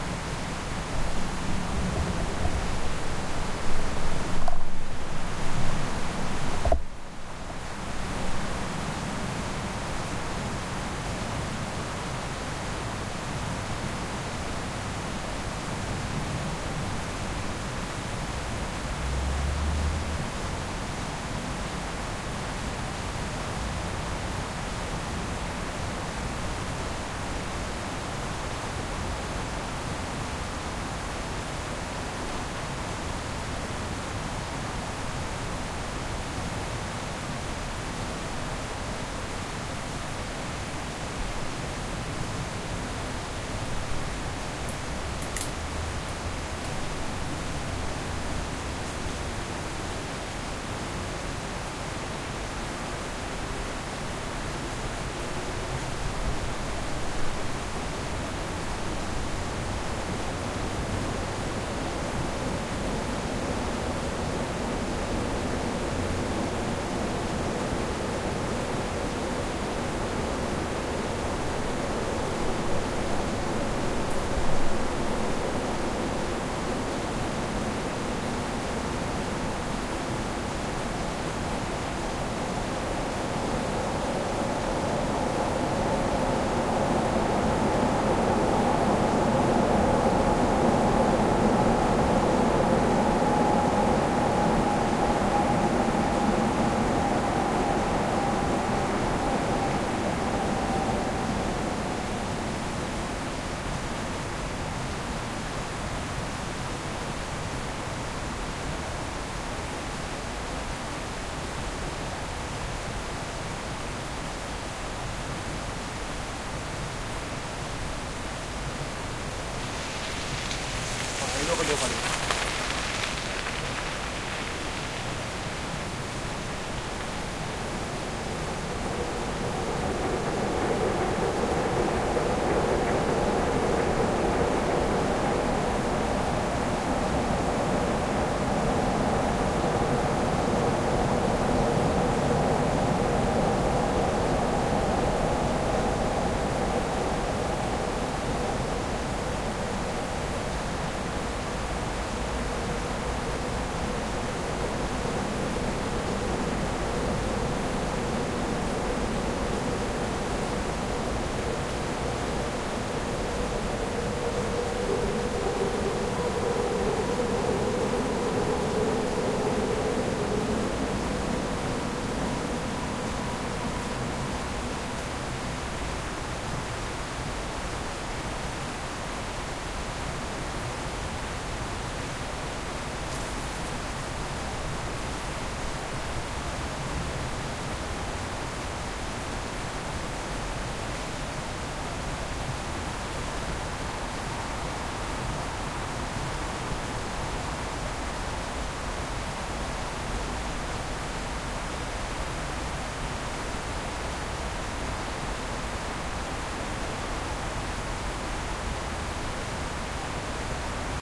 FOA Recording made with the Sennheiser Ambeo VR Mic and a Zoom f8 of the outdoor pool "Prinzenbad" in Berlin, Germany at night. You heear some water dripping and the subway driving by